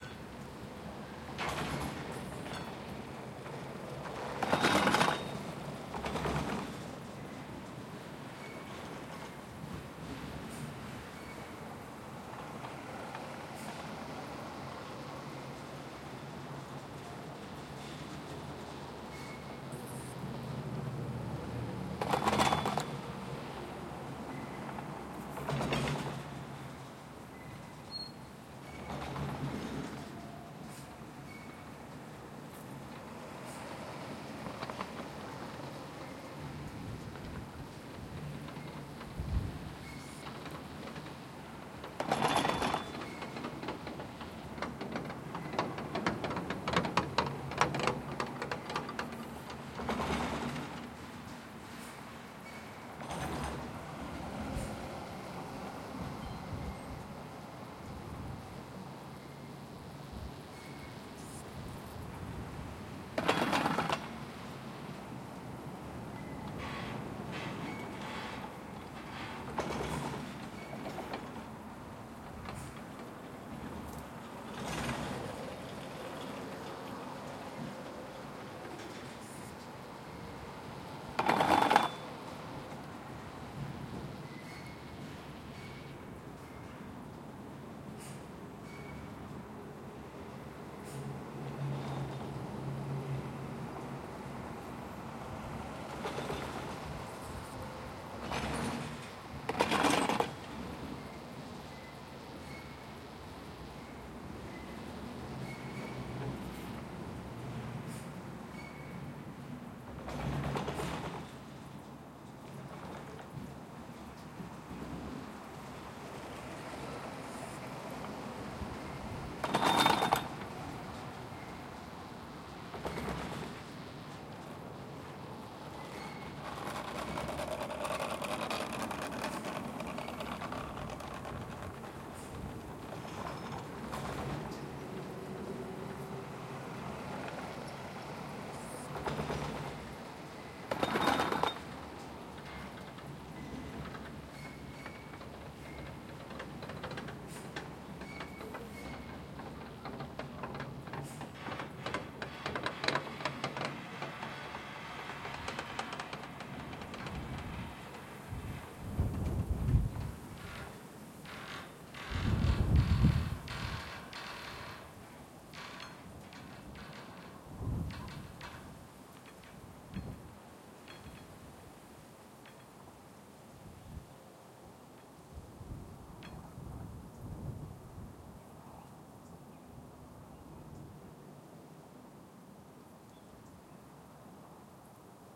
Recording of a cableway in the middle of the woods (part 2).